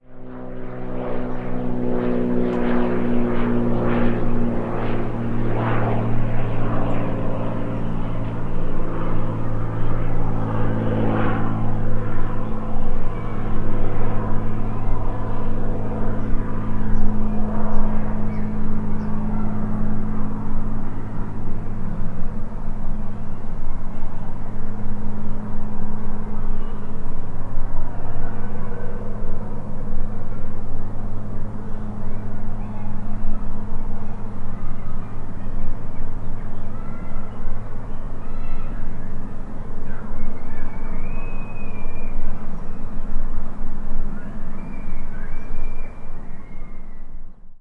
I was playing around with a mic i borrowed from my school when this little plane flew over my house. I quickly opened my window and recorded this sound. You can also hear some birds and kids playing (I live near a school). The sound is heavily gained so you should hear some noise.
birds, children, field-recording, nederland, plane, prop, propeller, veenendaal